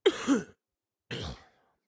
This is one of many coughs I produced while having a bout of flu.
Flu, Sickness, Cough